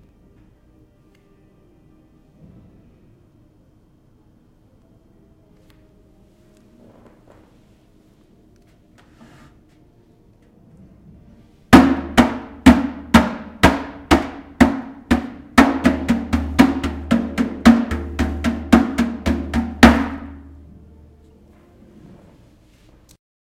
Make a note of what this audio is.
Heavy Trash Hit
Me hitting a trash can very hard.
can, hit, MTC500-M002-s13, trash